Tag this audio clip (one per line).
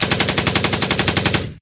akm
bullets
burst
gun
machine-gun
machinegun
machine-gun-burst
shooting
shot
weapon